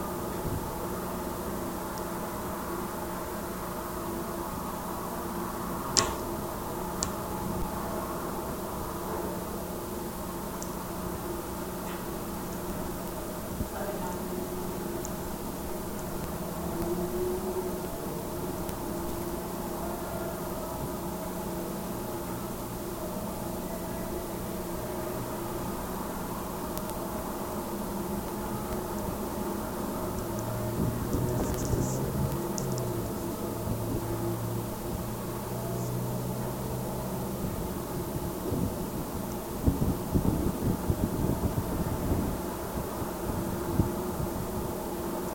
Denver Sculpture Scottish Calf
Contact mic recording of bronze sculpture “Scottish Calf” by Dan Ostermiller, 2006. This sits just to the east of the Denver Art Museum alongside the Cow. Recorded February 20, 2011 using a Sony PCM-D50 recorder with Schertler DYN-E-SET wired mic; mic on the body, near the right shoulder, windward.
contact, contact-mic, contact-microphone, Ostermiller, sculpture, Sony, wikiGong